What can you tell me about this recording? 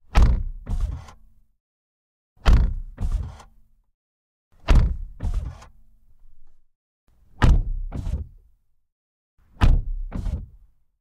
This ambient sound effect was recorded with high quality sound equipment and comes from a sound library called BMW 114i E87 which is pack of 47 high quality audio files with a total length of 125 minutes. In this library you'll find various engine sounds recorded onboard and from exterior perspectives, along with foley and other sound effects.